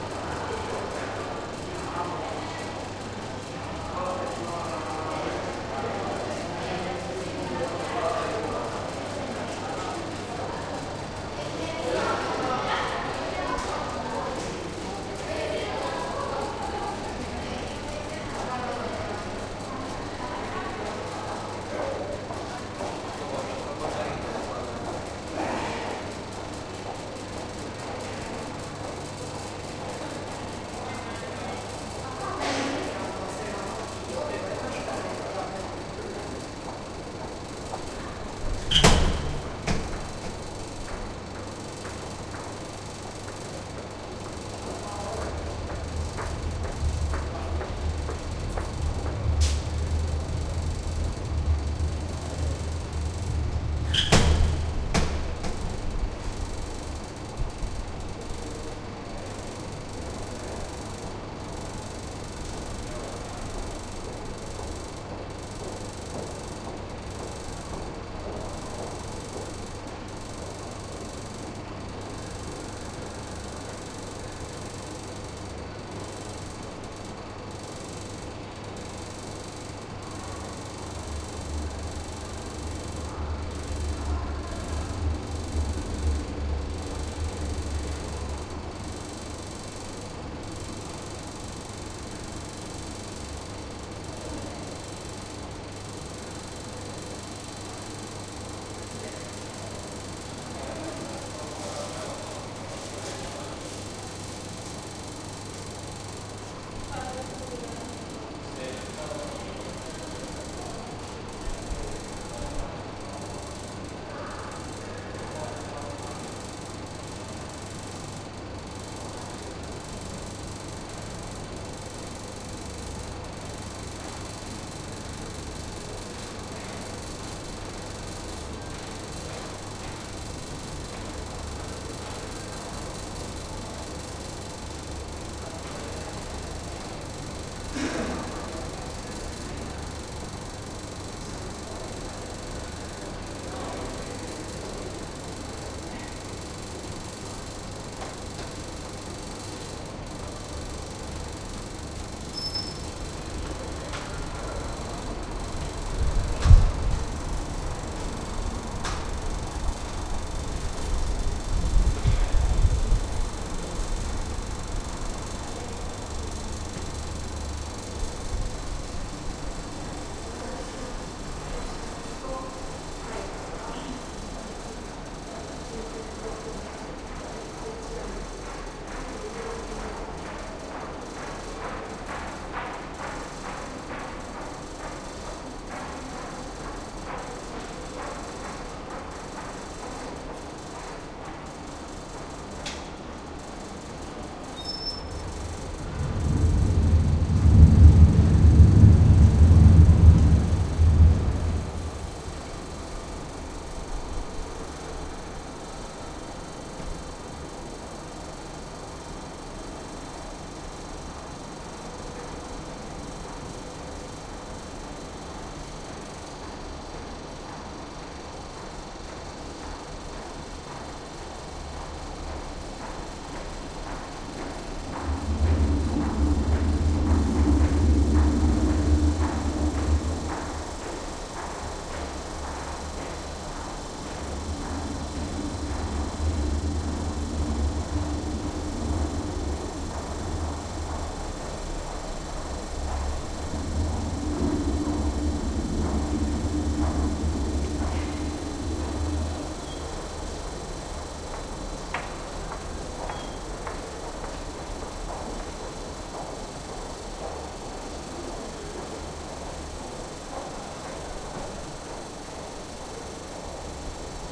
fan helsinki forumtunnelist
A fan with an interesting scrambling pattern. It's amazingly loud! Some footsteps and other "dirt" can also be heard, but this gives some contrast to how loud the fan actually is. Field recording from Helsinki, Finland.
Check the Geotag!
city, listen-to-helsinki, noise, ambience, tunnel, fan